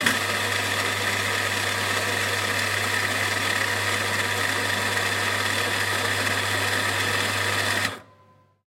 grinding the coffee beans for 1 serving of espresso coffee
espresso, field-recording, machine